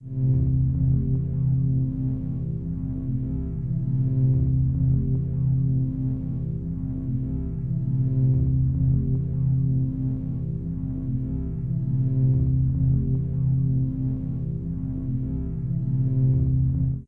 kaos ambience 4
Background sounds - experiment #4